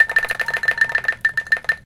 toy angklung (wooden shaken percussion) from the Selasar Sunaryo artspace in Bandung, Indonesia. tuned to western 12-tone scale. recorded using a Zoom H4 with its internal mic.